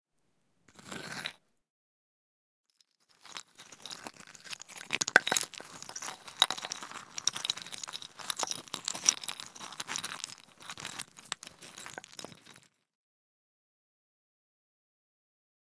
Playing with Stones
Playing with a pile of stones
Rode NTG2
2018